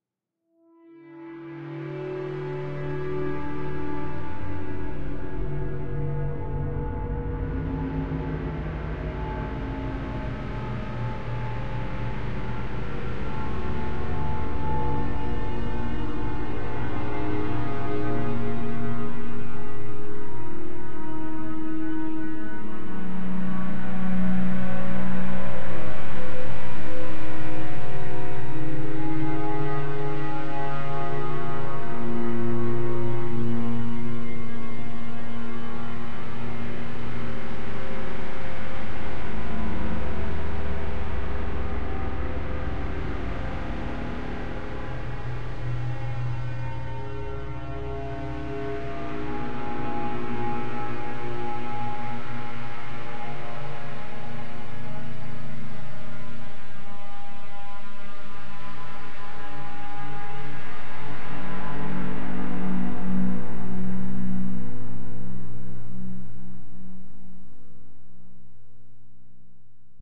archi soundscape space2
Instances of Surge (synth), Rayspace (reverb), and Dronebox (Resonant delay)
Sounds good for scary scenes in outer-space.
space, ambient, ambiant, evil, soundscape, outer-space, ambience